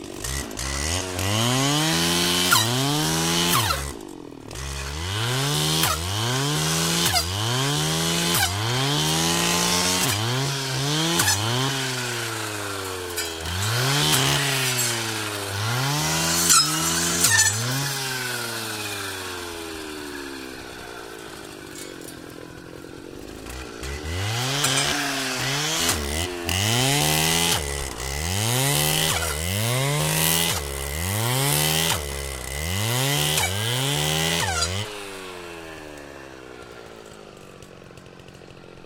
brushcutter engine cutting metallic hits blade stops distant 2 mono
This ambient sound effect was recorded with high quality sound equipment and comes from a sound library called Brush Cutter which is pack of 41 high quality audio files with a total length of 87 minutes. In this library you'll find various engine sounds of metal brush cutters.
blade, blades, brush, chain, construction, cutter, cutting, effect, engine, field-recording, industrial, machine, machinery, mechanic, mechanical, metal, metallic, mono, motor, noise, saw, sawing, sound